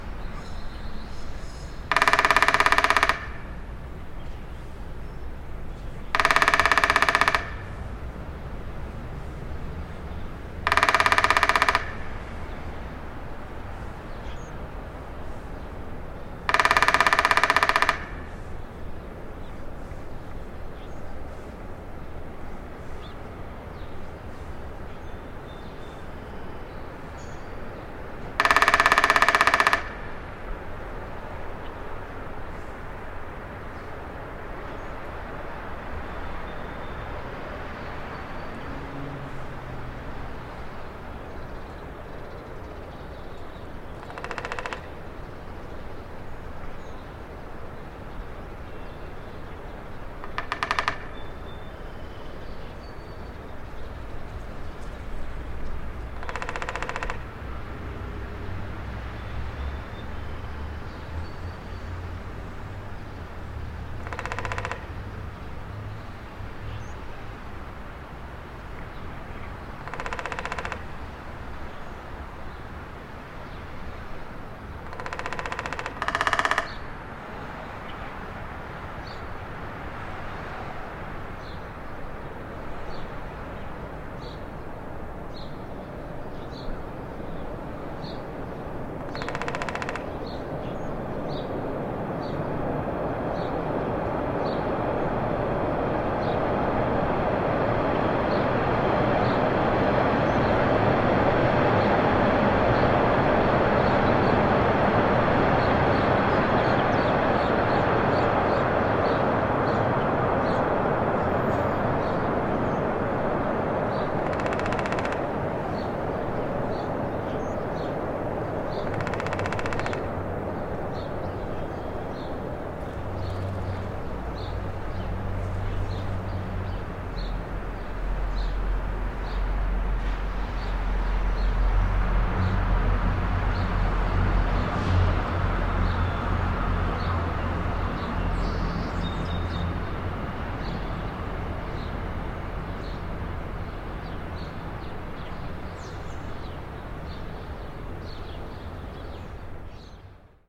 woodpecker clip Spr2011 Boston
Recorded April 7, 2011 - woodpecker in tree - both loud and soft tapping - Jamaica Plain neighborhood of Boston - environment includes cars and trains in background - Gear: Sennheiser K6/ME66 shotgun microphone and Marantz PMD660 recorder.